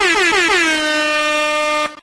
DJ airhorn sound
You know, that bwa-bwa-bwa-BAMMMMMMMMMMMMMMMM sound.
air-horn, airhorn, ba, bum, bwa, club, DJ